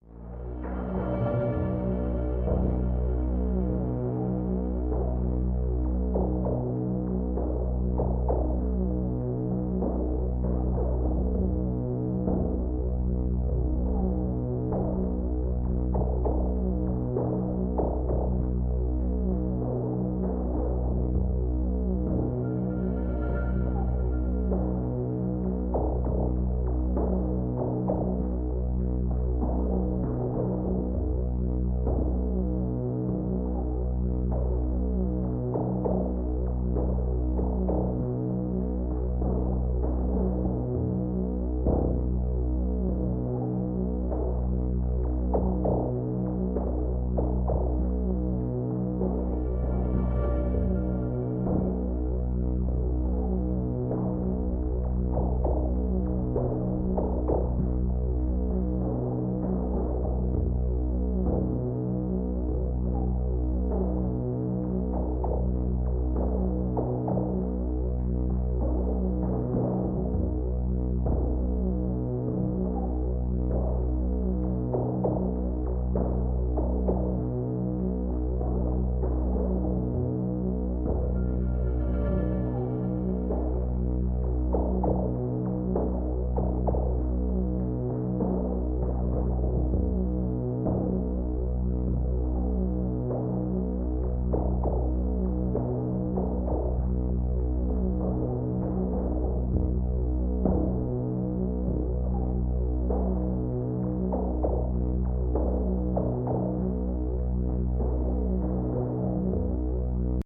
A spooky synth drone for ambience. Long enough to be cut down to a desired length, but simple enough that you could probably find a good looping point if you need it longer.